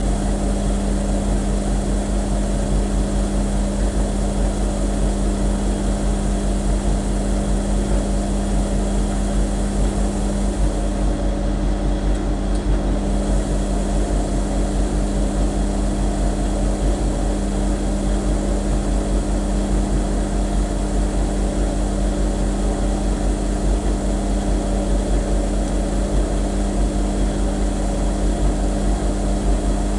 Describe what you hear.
This morning I made 2 recordings of the sound of my washing machine. I used a cheap web mic placed on top of the washing machine during the normal wash cycle. Each sample is about 30s long.This is the raw unprocessed sound original sound.Over the coming days I will add processed versions to this sample pack. Using filters and other effects, my aim will be to create rhythmic loops from these sounds.